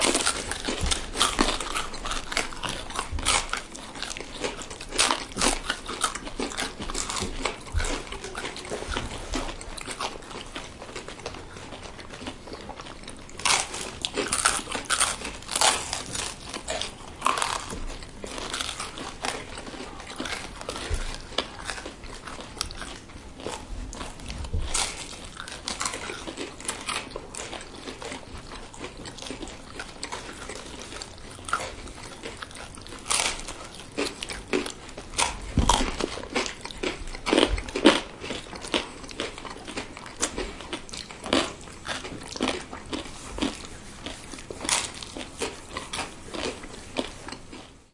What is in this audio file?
Recording of four individuals surrounding a mini disc/condenser mic setup, eating focaccia from Sullivan Street Bakery, New York